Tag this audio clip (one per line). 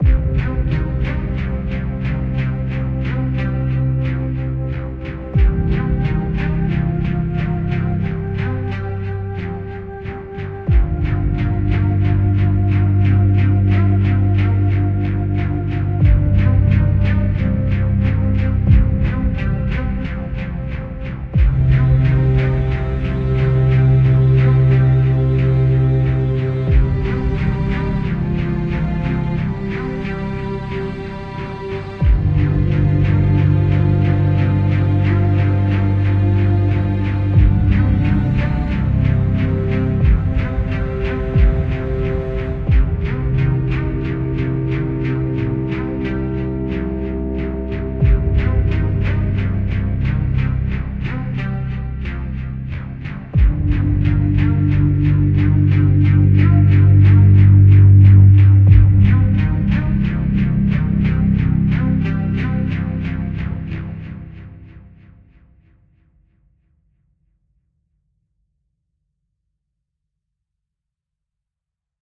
tribal
loops
Ice-breaker
electronic